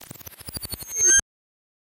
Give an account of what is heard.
Muted indicator of the end, game over or try again.